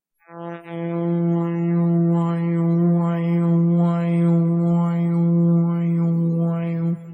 Sacrificial Summons
I basically reverbed a recording of me trying to replicate the "wah-wah" option in Audacity with my voice, which came out to this which you could probably use in some sort of sci-fi or fictional history TV show.
summons, loop, spooky, sacrificial, temple, weird, eerie